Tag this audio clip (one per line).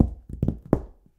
boxes
stomping
0
natural